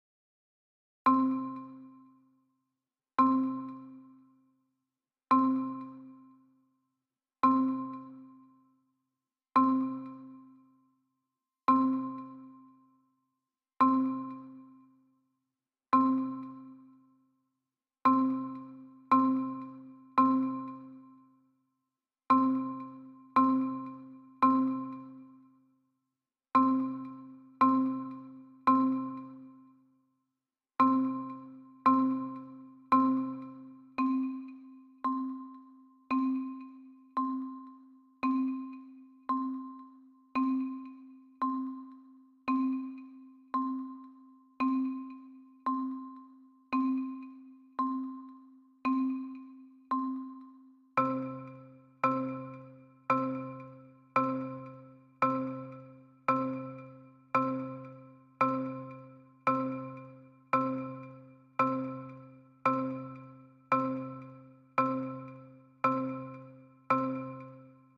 A short marimba loop i created a while ago!
It was part of my intern but this sample didnt make it through the final!
But i think it still can be use full in many ways!
Its the logic in stock Marimba of the EXS24 wich i really love